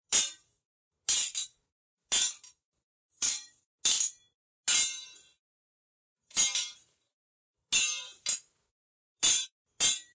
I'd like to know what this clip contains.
The sound of swords clashing
Action, Air, Battle, Sword, Weapon